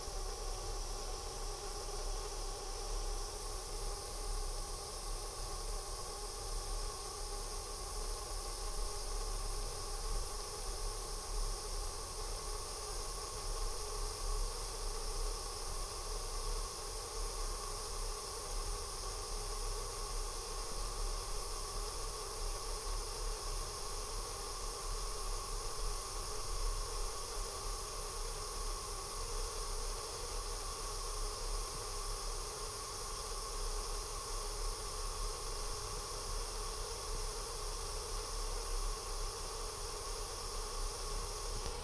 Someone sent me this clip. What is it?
NYC steam radiator hiss
genuine New York City steam heat, responsible for messing up many a video shoot.
hot, hiss, heat, radiator, heater, valve, steam